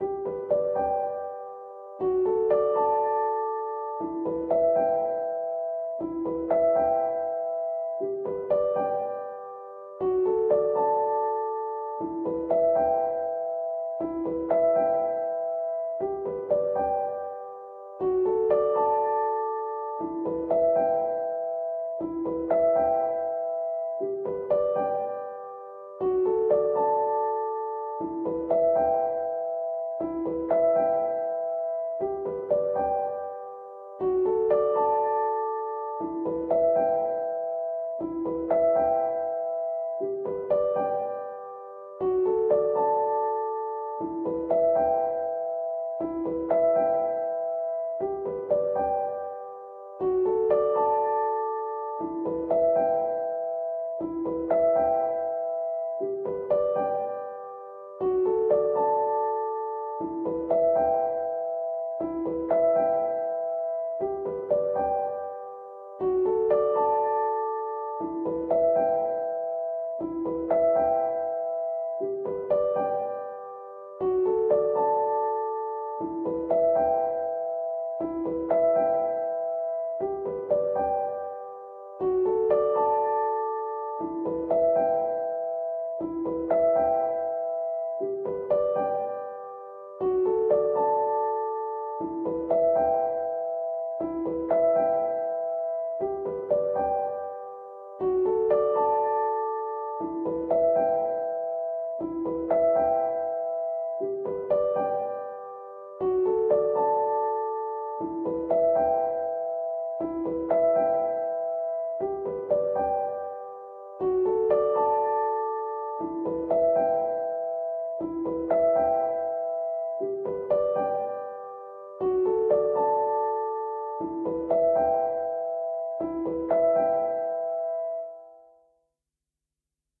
Piano loops 034 octave up long loop 120 bpm
120, 120bpm, samples, bpm, free, Piano, simple, music, loop, simplesamples, reverb